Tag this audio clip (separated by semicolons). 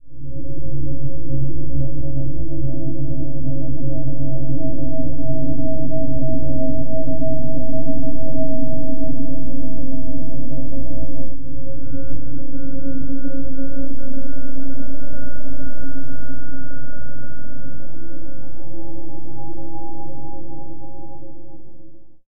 resonance; musical; ice